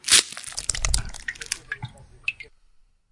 Bleed effect
he sound of being sliced open. Ouch!